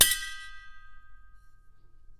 Hitting a metal water bottle with drumsticks.
Recorded with a RØDE NT3.